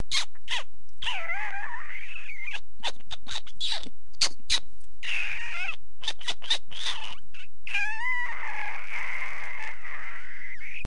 Me making kiss like noises, like the kind you'd make if you wanted to grab a cat's attention.
kiss, lips, sqeak